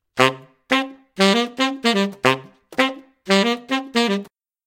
tenor short
A short, repeated riff built on chord tones, containing an octave jump, played on a tenor saxophone. Recorded 2014-11-30.
tenor-saxophone, rhythm, riff